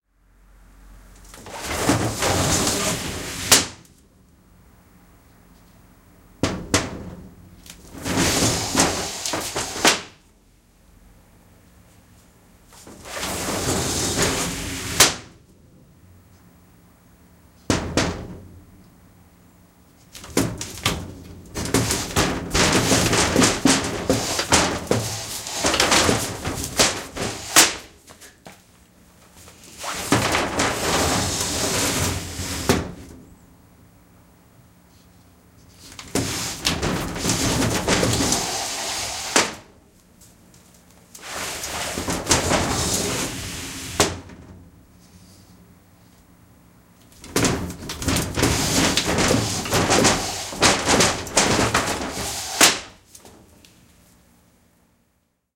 Opening and closing paper sliding door 01
Opening and closing paper sliding door.
door, japanese, paper, sliding